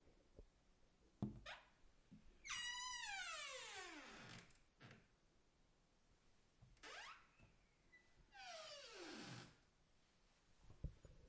Squeaky Door Opened
Squeaky bedroom door.
Recorded October 22, 2018
with Zoom H5
creaky, squeaky, open, close, squeak, door